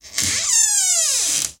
Recording of the hinge of a door in the hallway that can do with some oil.